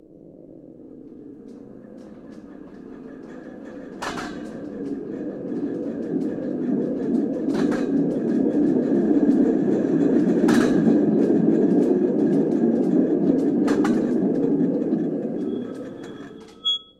EARTH - A Indiana Line Th-230S loudspeaker is mounted on a cart which movesdown a 10-meter pipe (diameter 30 cm), playing a rolling sound thatturns into braking in the end. The sound is computed in real time bymeans of physical models of impact/rolling [1] and friction [2]. Thephysical models are controlled via a PureData/GEM interface [2-fig.4.a]. Sound is captured by a Beyerdynamic MCD-101 omnidirectional digital microphone placed at the exit of the tube. --------- references --------- [1] Matthias Rath and Davide Rocchesso, Continuous Sonic Feedback from a Rolling Ball , «IEEE Multimedia», vol. 12, n. 2, 2005, pp.
braking, earth, friction, rolling, tube